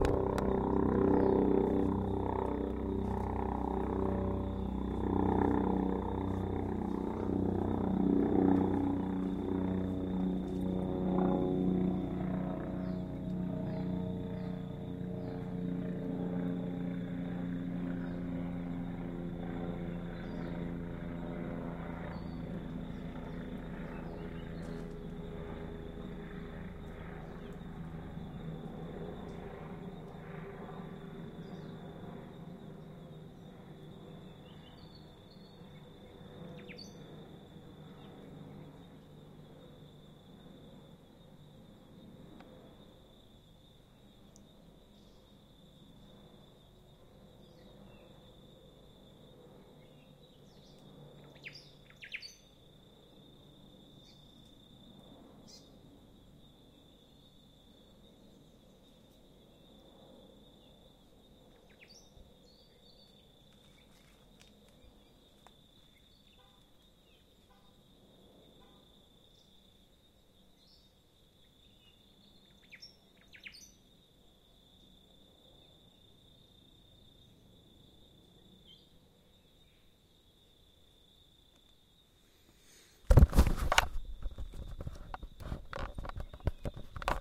Recorded in 2011 while coming out of the Thurston Lava Tube in Volcanoes National Park on the island of Hawaii. There was an incredible phasing sound that I missed while trying to get the recorder out, but you can hear a little at the beginning.